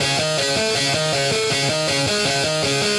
80 Fowler Gut 01
bit, blazin, crushed, distort, gritar, guitar, synth, variety